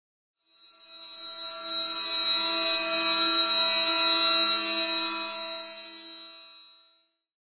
Horror Stalker
Pad sound, high pitched tension builder
ambient, edison, fl, flstudio, soundscape